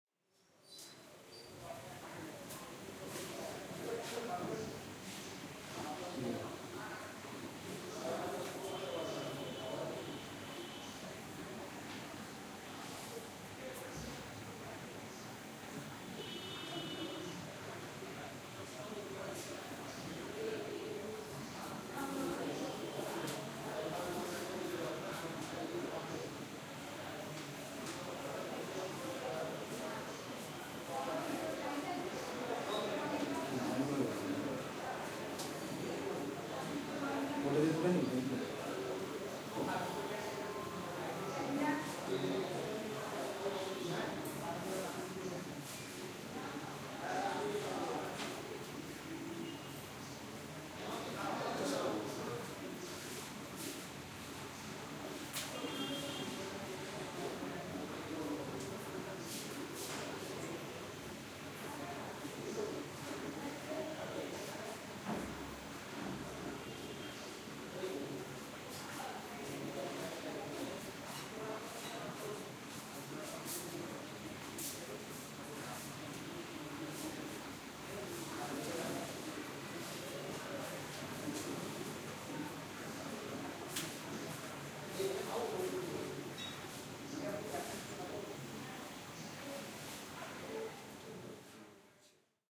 Hospital Busy X-Ray Room tone
Recording of a busy hospital room tone during the day in Kolkata, India
Recorded with Zoom H6